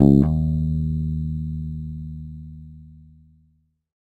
First octave note.